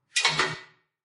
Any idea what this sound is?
Metal hit against metal
Original recording: "Clang_Metal_(hit)" by Gadowan, cc-0
clang, latch, locker, metal